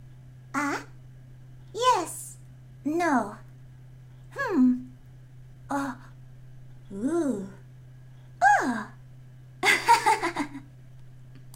RPG sounds - a cute girl that may be part of your party.